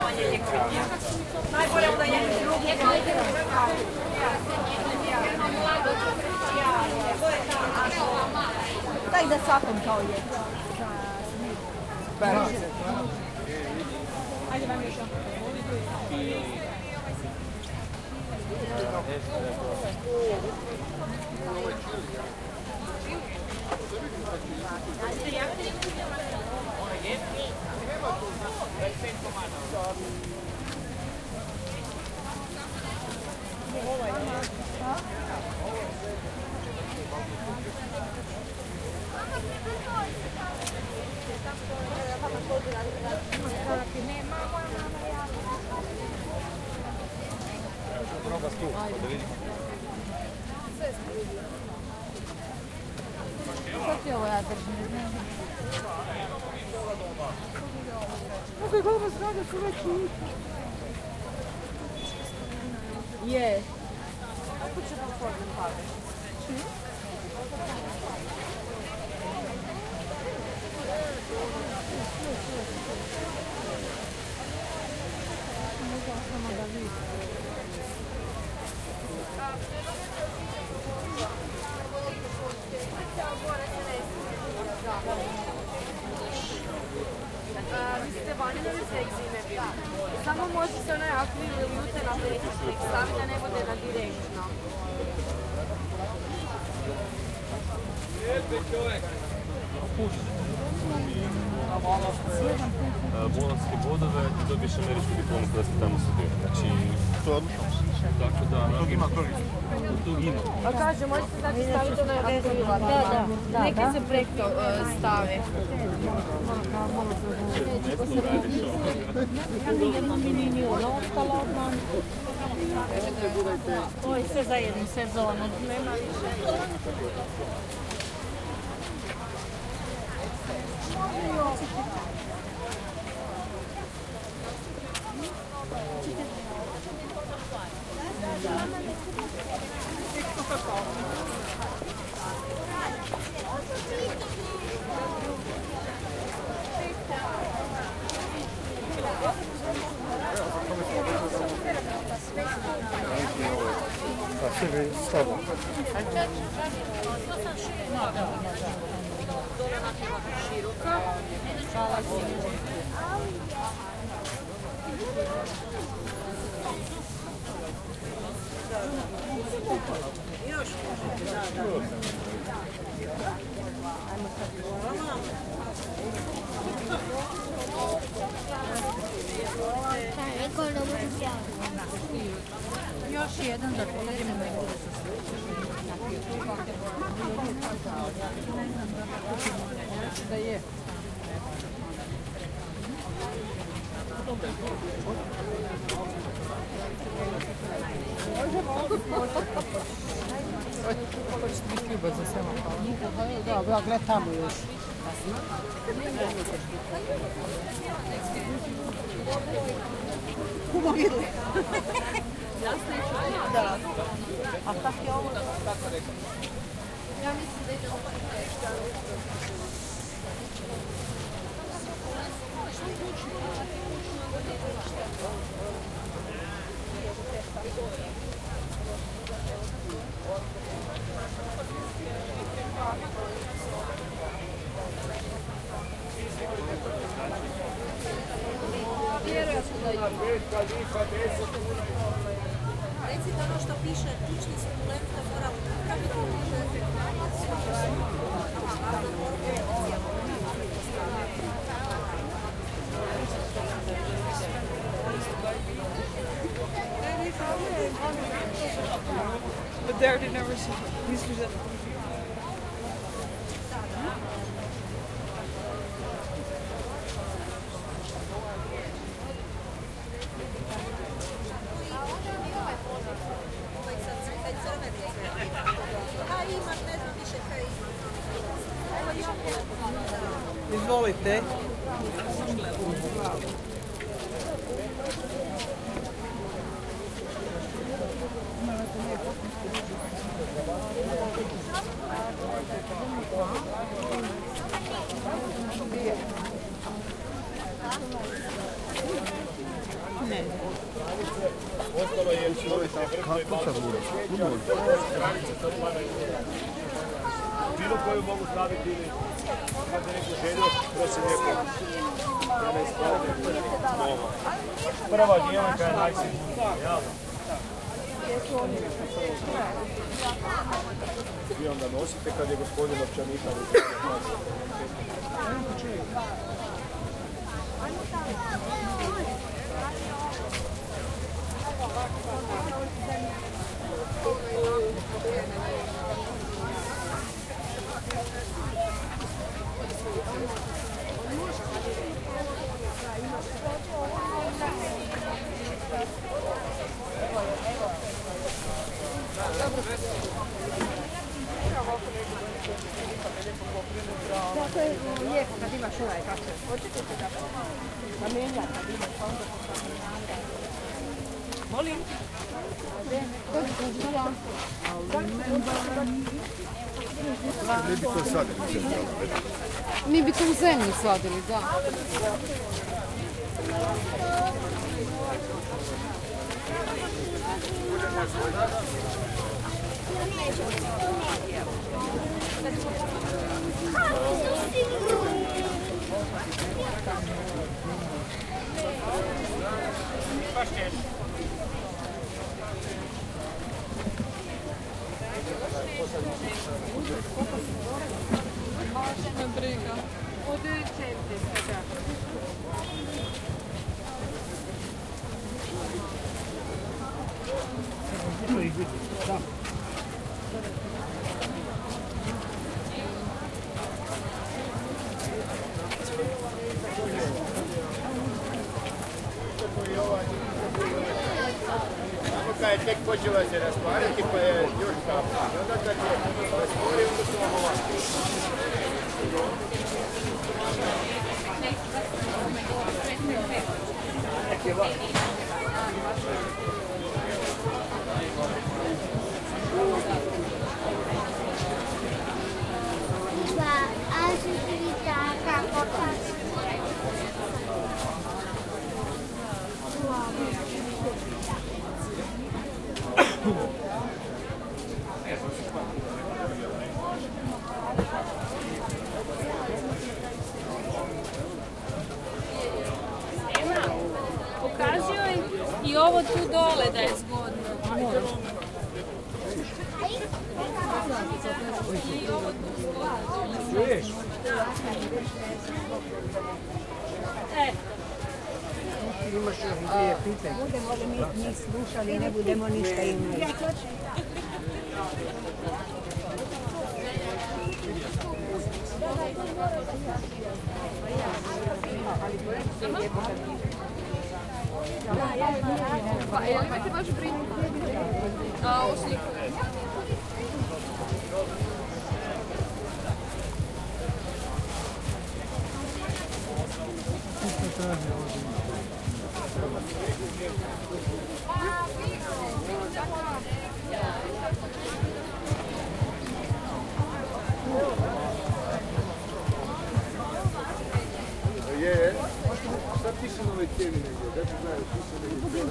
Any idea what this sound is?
Unprocessed recording of market walla. Language: croatian.